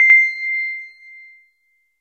This sample is part of the "K5005 multisample 05 EP
2" sample pack. It is a multisample to import into your favorite
sampler. It is a double electric piano like sound with a short decay
time. In the sample pack there are 16 samples evenly spread across 5
octaves (C1 till C6). The note in the sample name (C, E or G#) does
indicate the pitch of the sound. The sound was created with the K5005
ensemble from the user library of Reaktor. After that normalizing and fades were applied within Cubase SX.
electric-piano, multisample, reaktor